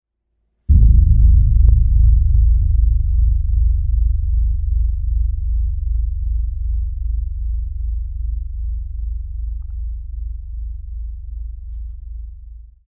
Low End Hit and Rumble
Sounds really bassy.